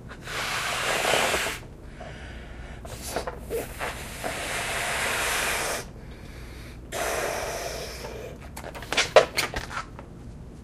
Blowing up a balloon to pop for my impulse response experiments.